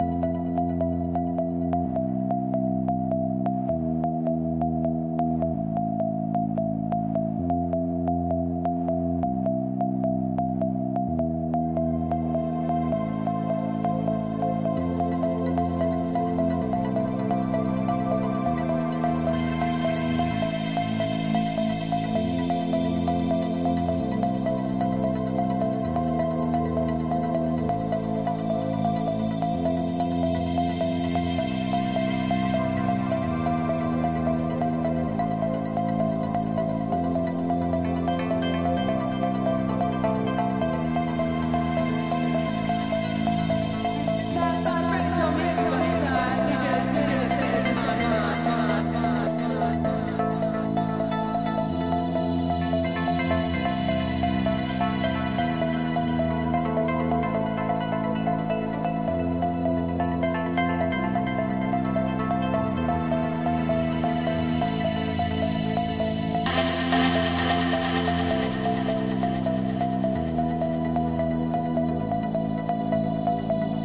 Intro - electronic loop.
Synths:Ableton live,silenth1,S8,Massive sampler.
ableton, ambiance, ambient, atmosphere, dance, effect, electronic, Intro, loop, loopmusic, music, pad, rhythmic, sound, synth, trance